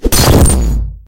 anime punch
A cartoonish punch inspired by JoJo's Bizarre Adventure.
damage, anime, punch, cartoon, impact